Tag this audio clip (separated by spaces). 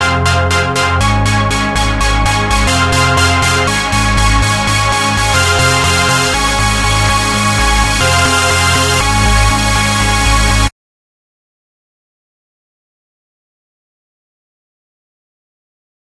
Dance EDM Music